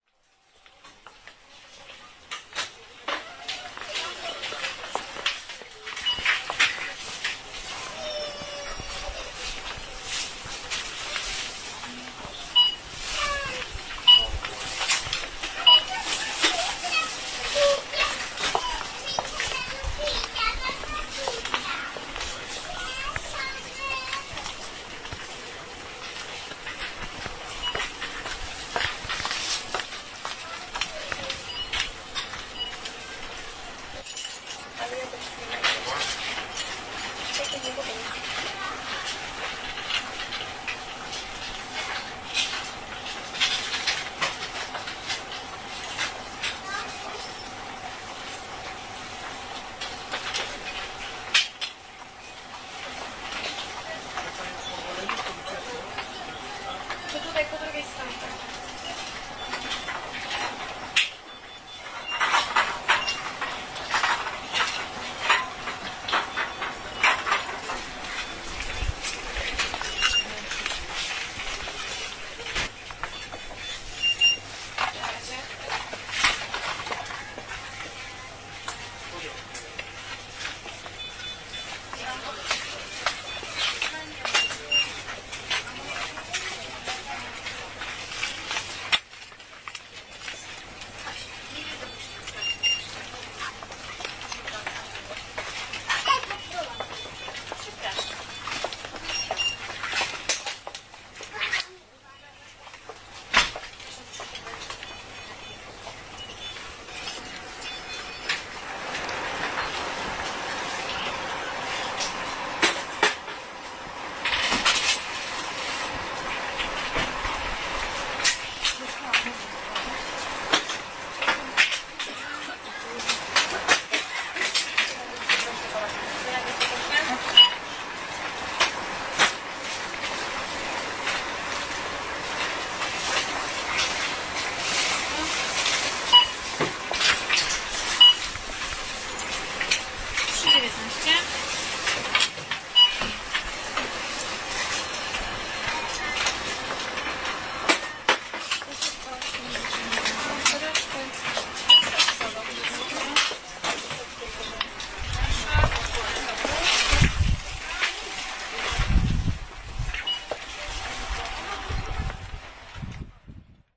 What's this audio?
23.09.09: about 18.00. I am in the Rossman chemist on Szkolna street in the center of Poznań.